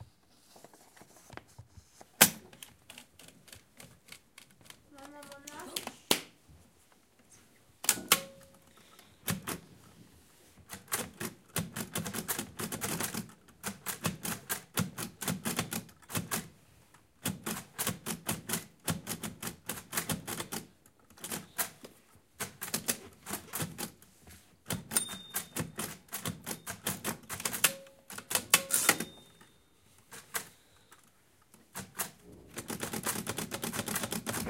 SonicSnaps-IDES-FR-perkins-brailler1
Someone writing on a Perkins Brailler.
A typewriter that types and prints Braille.